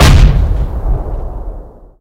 Dark Detonation Type 02
This sample is actually just a Pyrocracker explosion.I recorded this with my Handy mic.This sample has been Modified using Fl-Studio 6 XXL and Audacity.this Sound have been processed several times to generate this "Bassy" Sound
Detoantion, Explosion